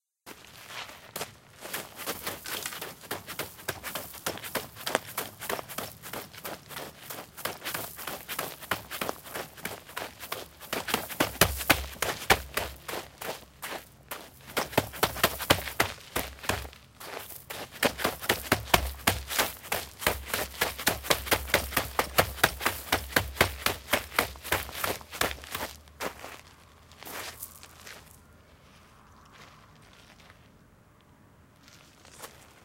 Gravel Running
Recording of various steps on Gravel
going, gravel, grit, running, Steps, stones, walk, walking